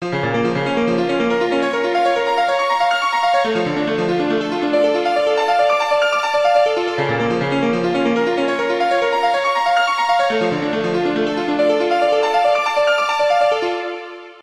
Dark Evil Piano
Dark , Evil, Piano with a layer of strings..
Created w/ FL5 back in 2005 or 2006 , was originally an experimental hiphop beat .. Sound includes Piano, and 5 different styles of strings over each piano note.
classical, dark, evil, horror, loop, mood, music, mysterious, mystery, new, orchestra, orchestral, piano, scary, spooky, strings, violins